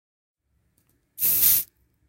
The sound of applying deodorant spray
aerosol, deodorant